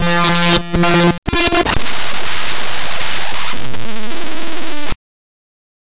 created by importing raw data into sony sound forge and then re-exporting as an audio file.